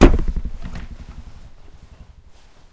records, oneshot, punch, zoom,